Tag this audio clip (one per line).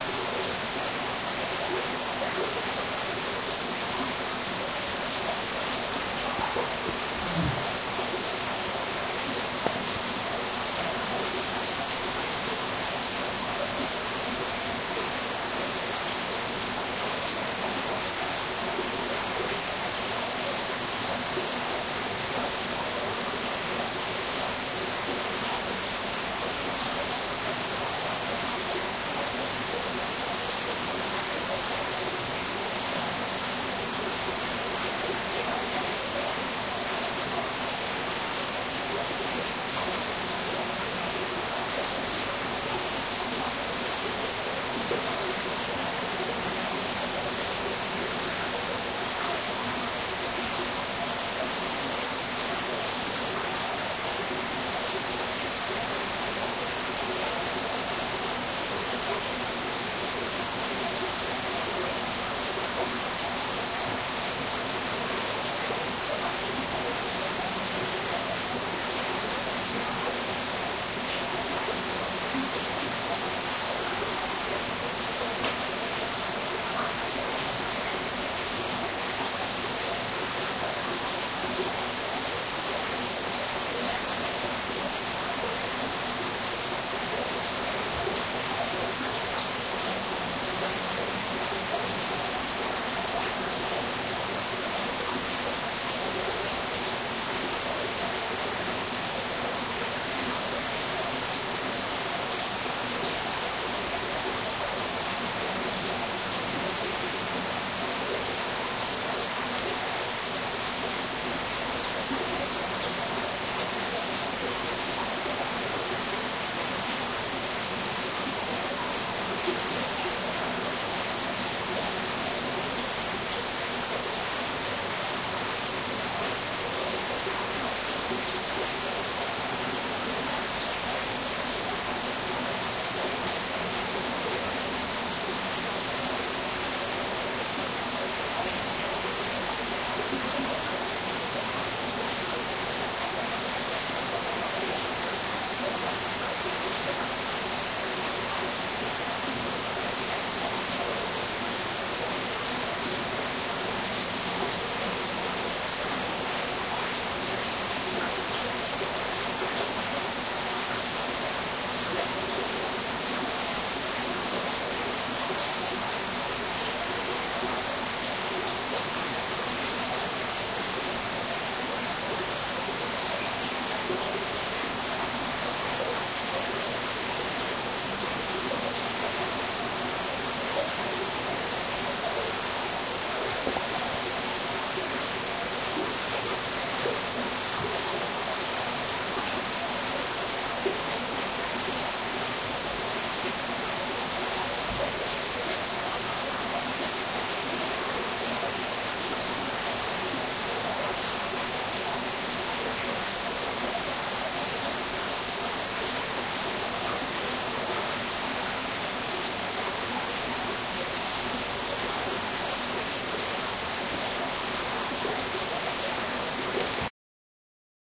crickets
night
field-recording
summer
insects
ambient
river
nature
stream
ambience